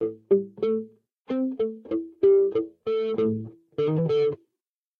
This is from a collection of my guitar riffs that I processed with a vinyl simulator.This was part of a loop library I composed for Acid but they were bought out by Sony-leaving the project on the shelf.